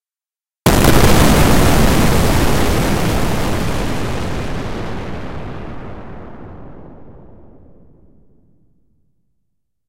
spaceship explosion10
made with vst intrument albino
shooting, future, impulsion, rumble, atmosphere, war, blast, fighting, gun, warfare, sound-design, battle, shooter, laser, energy, explosion, fire, weapon, impact, torpedo, futuristic, firing, shoot, fx, spaceship, military, noise, soldier, space, sci-fi